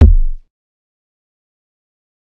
SemiQ kicks 4.
A small mini pack of kicks drum kick kit
beat, drum, drums, hit, kick, kit, percussion